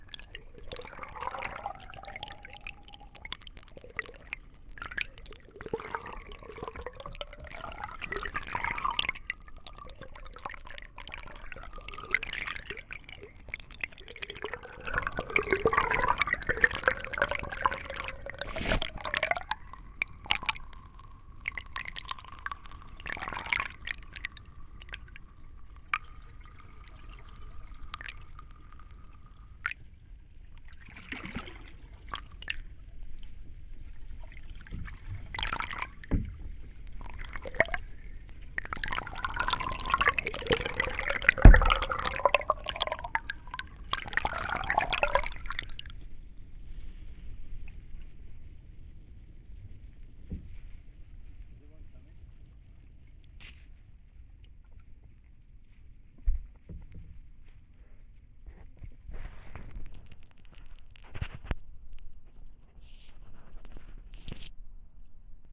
paddling in lake lbj 08232013 2
Noises recorded while paddling in lake LBJ with an underwater contact mic
aquatic, contact-mic, dripping, gurgling, rowing, underwater